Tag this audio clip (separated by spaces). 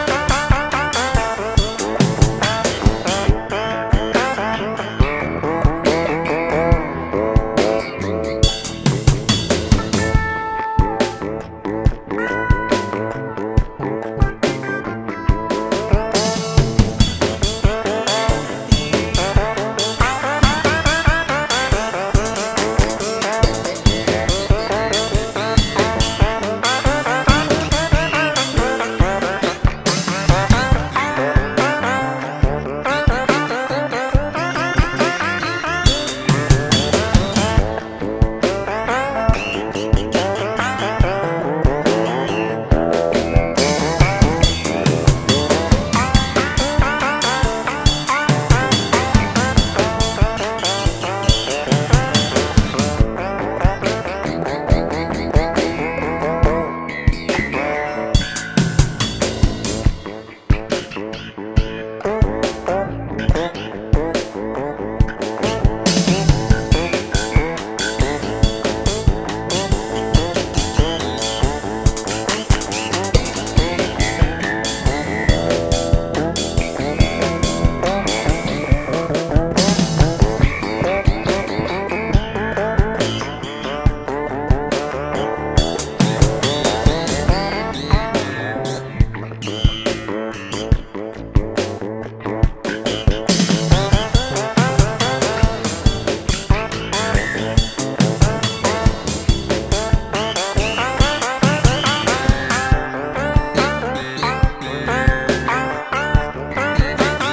140 Backing Bass BPM Drums Guitar Jazz Loop Music Synth